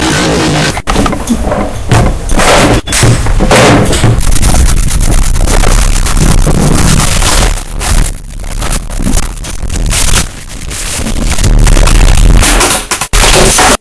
Soundscape LGFR Mayola Galeno
French students from
Grimault, France, Bretagne, L, Rennes